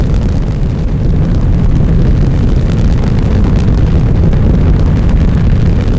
Seamless Rocket Booster Roar & Crackle
Made seamless in Audacity
produced by blowing on headset microphone with distortion + added fire crackles
closely resembles Saturn V rocket boosters =)
Explosion, Fire, Looped, Rocket, Rocket-Thruster, Saturn-V, Seamless, Thrust